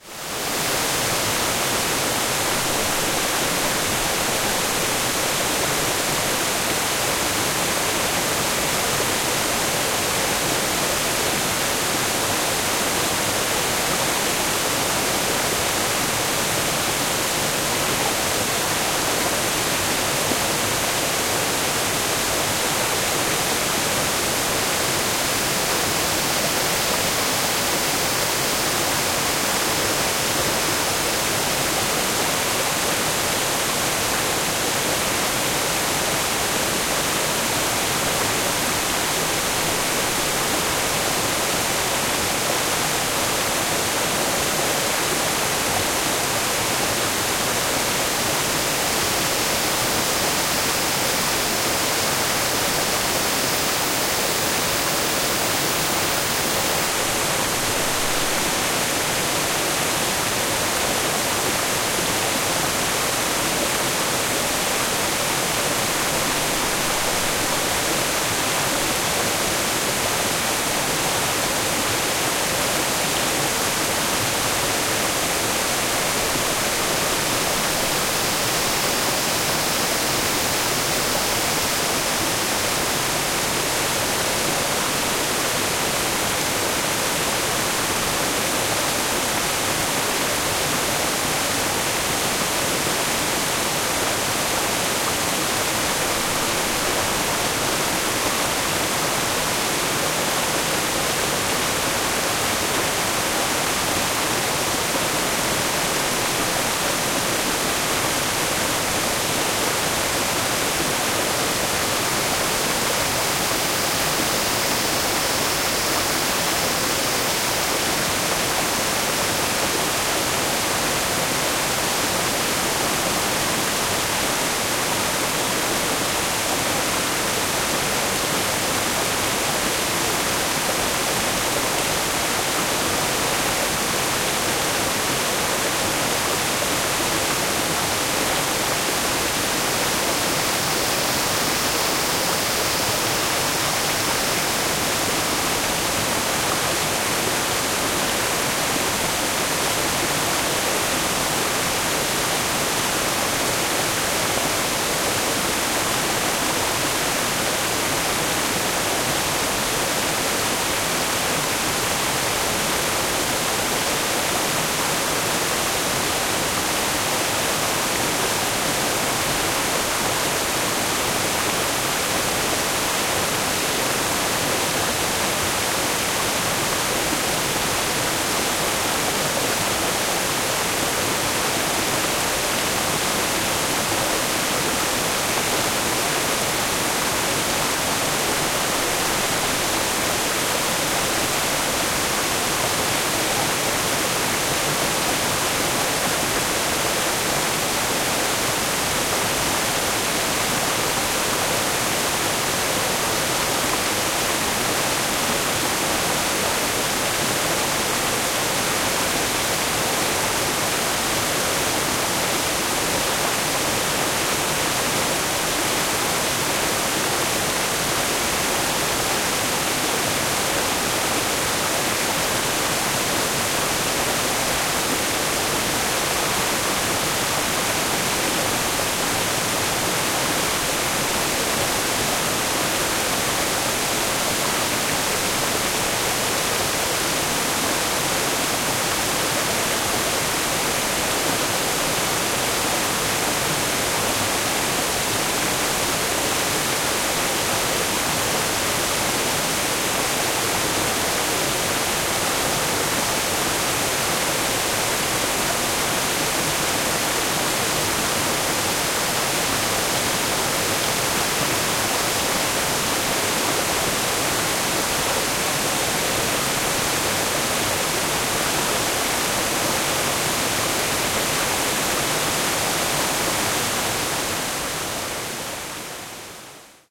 Waterfall with cicadas.
Interior of Minas Gerais, Brazil.
cachoeiras, belo-horizonte, river, tangara, water, rural, forest, cicadas, countryside, brasil, nature, brazil, stream, waterfall, rio-acima, minas-gerais, field-recording